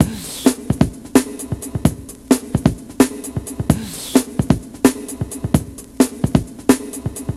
130-the-break-old-breakbeat
beats, breakbeats